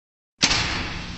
Electronic Lock Opening
Metal Impact/Door Slamming and altered heavily
lock, sfx, sound-design, sounddesign